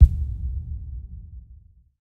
Deep Cinematic Impact
A typical deep impact cinematic sound effect. Created in Cubase 7 by adding reverb and EQ on a pre recorded punch sound.
Boom, Cinematic, Dark, Deep, Effect, Film, Hit, Impact, Low, Movie, Trailer